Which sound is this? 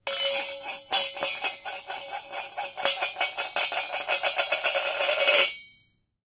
Small metal lid spins around on floor. Every crash of junk needs this at the end. Recorded with ECM-99 to Extigy sound card. Needed some elements for a guy crashing into some junk. Accidentally had phonograph potted up on mixer - 60 cycle hum and hiss may be present. Used noise reduction to reduce some of this.